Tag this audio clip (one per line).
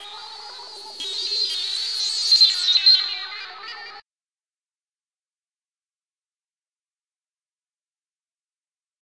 space-ships
sf
alien
outerspace